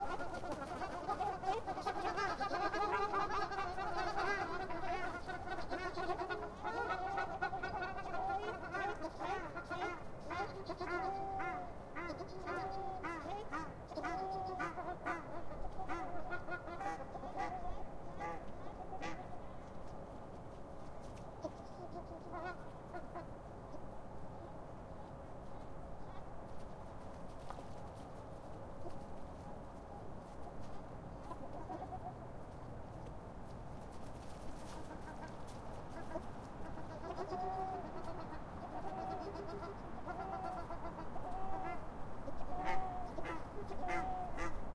duck on a frozen pond in the winter in Moscow